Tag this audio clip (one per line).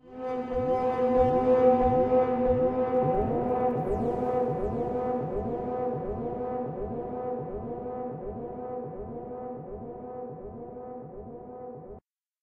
delay
drone
fx
soundeffect